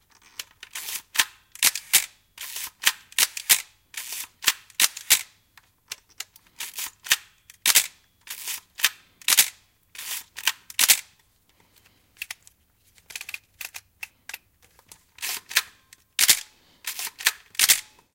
20170722.photo.camera.dry
Operating an old Nikon FM analog photo camera, no echo. Sennheiser MKH 60 + MKH 30 into Shure FP24 preamp, Tascam DR-60D MkII recorder. Decoded to mid-side stereo with free Voxengo VST plugin
camera, studio, model, photo, portfolio, photography, shutter, fashion, modelling